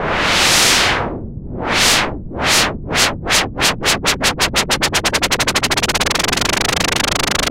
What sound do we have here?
White Noise Up 128bpm 4bars stereo dry
Riser, FX, White-Noise, Tension, Sweep, Effect